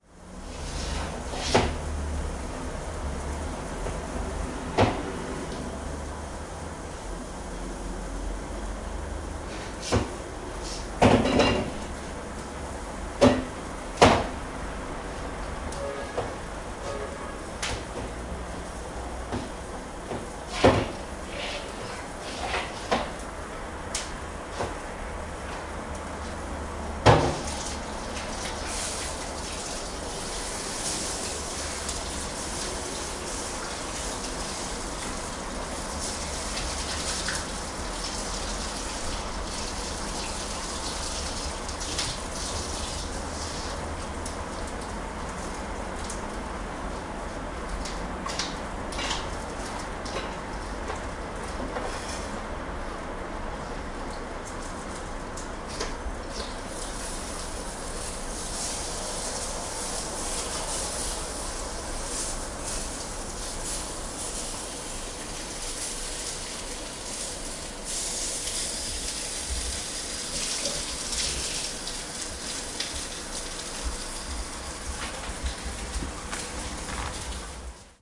Making Breakfast in Bucharest
A short recording of making breafast.